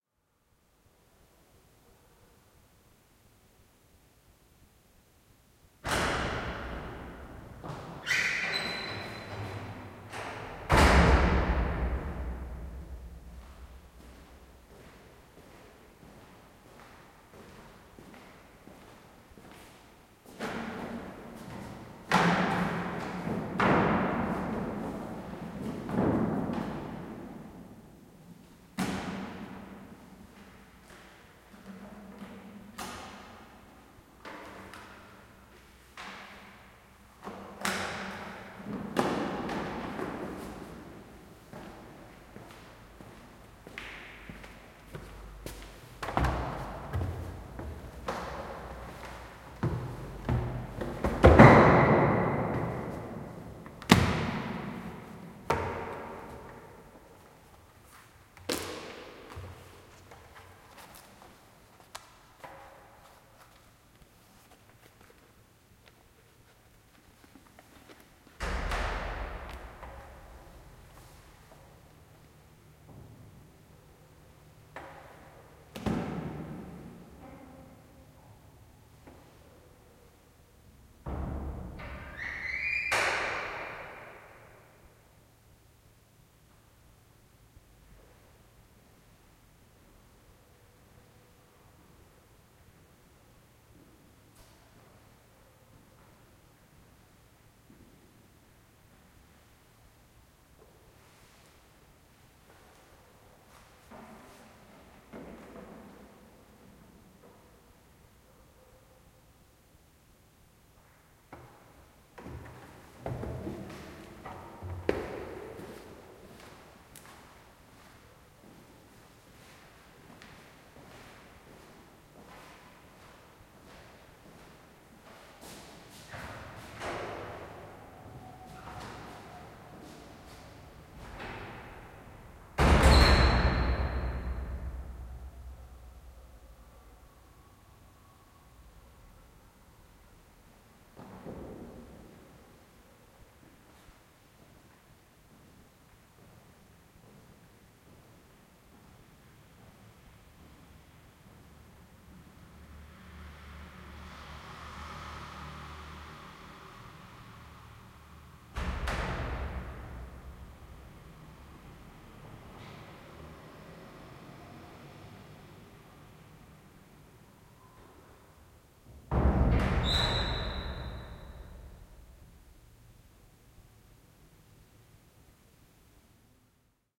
Church entering and leaving
A man enters through a old wooden door and walks to a bench where he opens a book. He the walks closer to the microphone to another bench and sits down and opens a book. A woman enters more quietly and sits down on a bench. They both leave.
Recorded in the church of Contra in Ticino (Tessin), Switzerland.